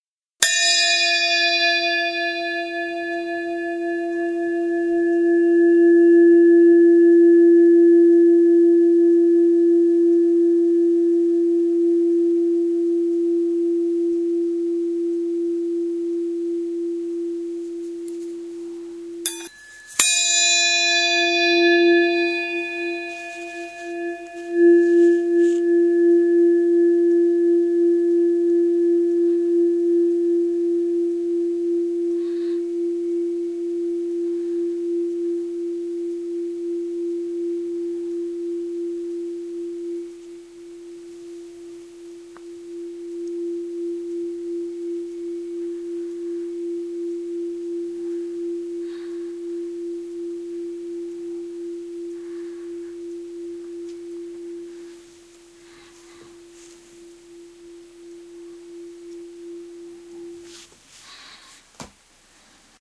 br Gong0 all
funny sounding gong from India.